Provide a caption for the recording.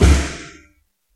Bonks, bashes and scrapes recorded in a hospital.